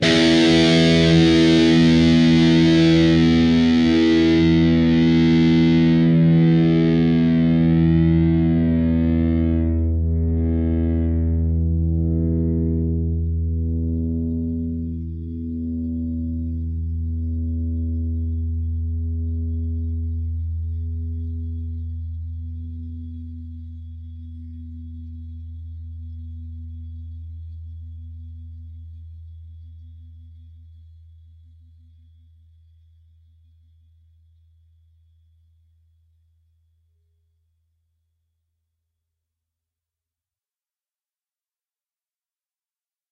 Dist sng E 6th str
E (6th) string.